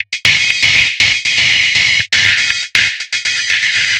20140306 attackloop 120BPM 4 4 Acoustic Kit Distorted loop1i
This is a loop created with the Waldorf Attack VST Drum Synth. The kit used was Acoustic Kit and the loop was created using Cubase 7.5. The following plugins were used to process the signal: AnarchRhythms, StepFilter (2 times used), Guitar Rig 5, Amp Simulater and iZotome Ozone 5. Different variations have different filter settings in the Step Filter. 16 variations are labelled form a till p. Everything is at 120 bpm and measure 4/4. Enjoy!
120BPM, beat, dance, distorted, drumloop, electro, electronic, filtered, granular, loop, rhythmic